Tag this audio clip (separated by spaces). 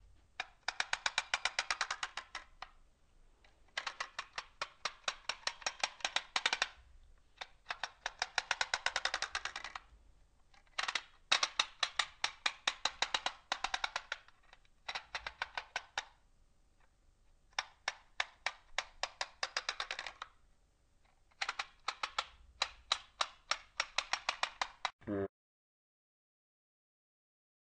metal dmi deslizar diseo medios interactivos Audio-Technica estudio plstico